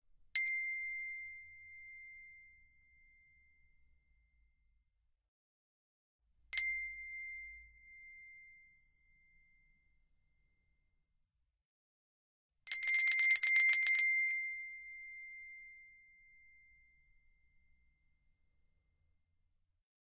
chromatic handbells 12 tones c2
Chromatic handbells 12 tones. Upper C tone.
Normalized to -3dB.
chromatic, double, English-handbells, percussion, ring, single, tuned